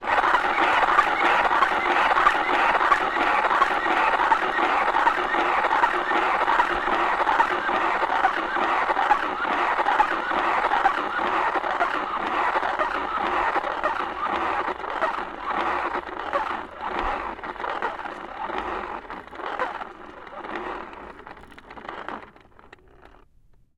I found a busted-up See-N-Say in a thrift shop in LA. The trigger doesn't work, but the arrow spins just fine and makes a weird sound. Here are a bunch of them!
recorded on 28 July 2010 with a Zoom H4. No processing, no EQ, no nothing!
glitch, mattel, see-n-say, static, toy